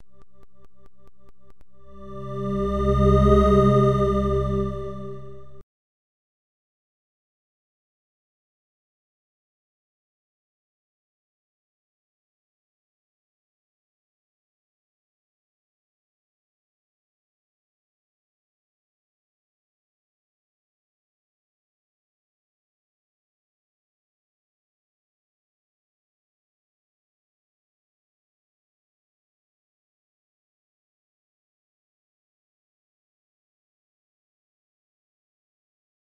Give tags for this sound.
loop
nightmare
sound

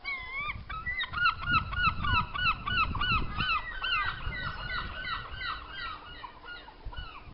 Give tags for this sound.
Stezzer
calling
beach
seaside
seagulls
bird
side
wind
chirping
birds
whitby
sea
ocean
gulls
yelling

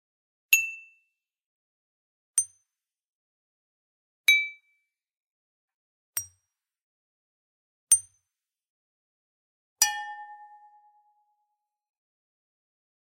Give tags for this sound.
cartoon
clang
zing
bang
impact
boing
ding
spoon
glass
clash
crash

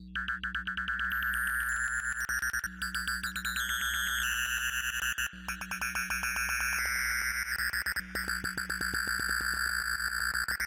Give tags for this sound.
grains
bells
morphing
evolving
reduction
melodic
bit
granular
bit-reduction

